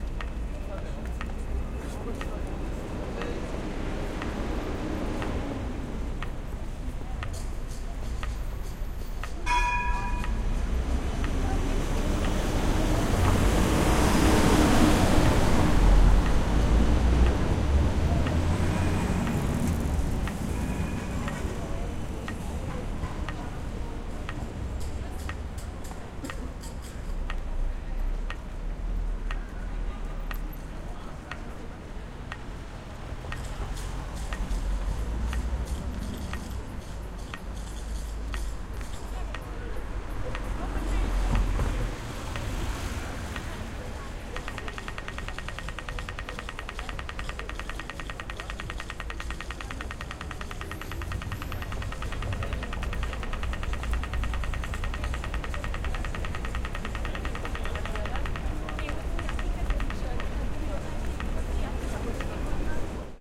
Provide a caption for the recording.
Recording of the audible traffic lights to help blind people in Amsterdam. M-Audio Microtrack with it's own mic.
amsterdam, blind, city, field-recording, traffic, traffic-lights